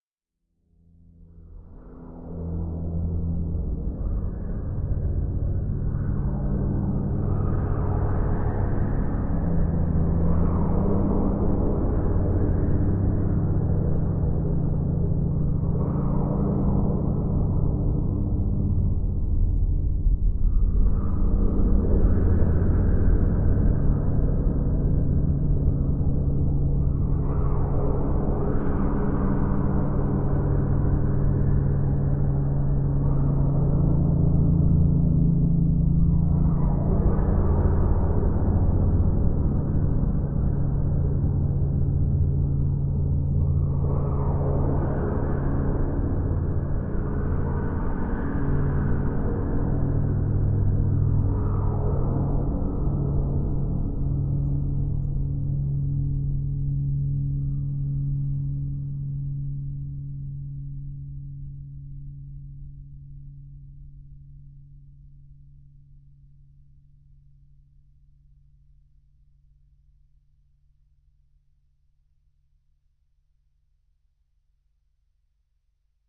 Echoing wind
Low, warbling drone with heavily phased mid-range tones layered over top. This sound was generated by heavily processing various Pandora PX-5 effects when played through an Epiphone Les Paul Custom and recorded directly into an Audigy 2ZS.
wind, drone